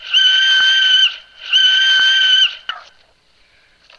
Flowers Like to Scream 11
High pitched screaming looped forward and reverse. Yes, flowers do like to scream. A lot. God, I have no life :)
not-art, screaming, noise, stupid, yelling